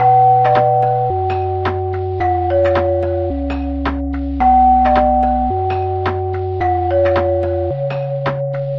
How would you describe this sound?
another electronic sample